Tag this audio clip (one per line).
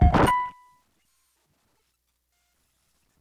Casio
Table
Hooter
Bent
Circuit